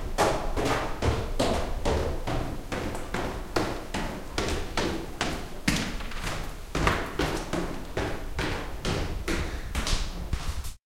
Go down an old woodn spiral staircase (fast)
Footsteps, Running, spiral, staircase, Stairs